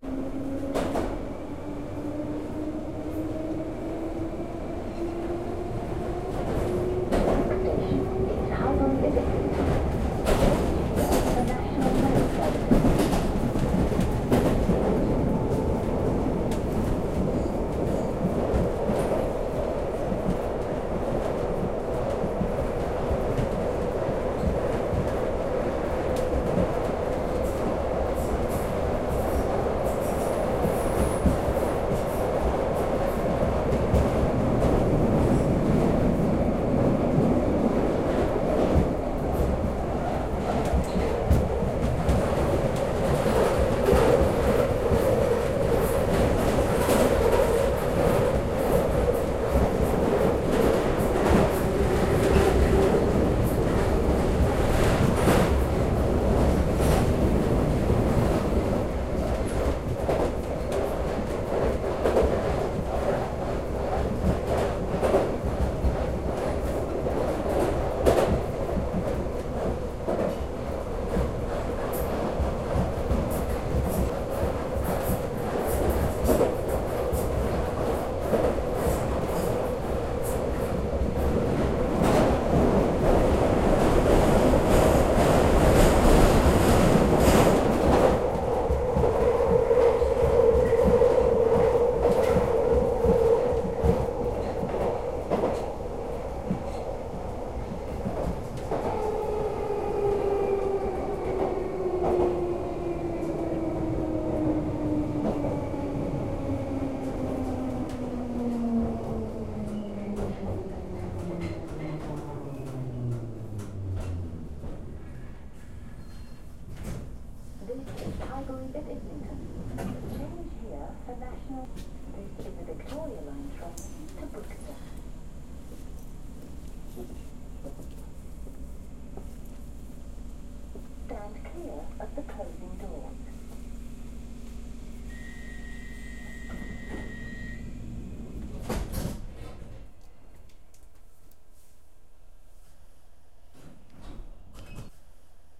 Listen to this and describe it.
the entire journey from Finsbury Park to Highbury & Islington on the Victoria Line, London Underground, including announcements.